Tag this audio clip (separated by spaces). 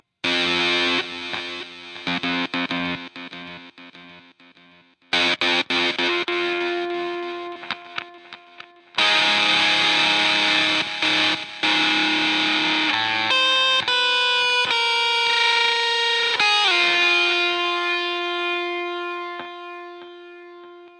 fuzz 100